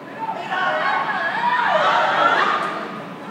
in the heat of night (air conditioners noise can be heard) people cheer the victory of the 2008 European Football Cup by Spain, on June 29th.
football, voice, clapping, field-recording, cheering